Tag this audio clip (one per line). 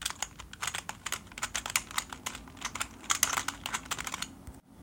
keyboard
keystroke
typing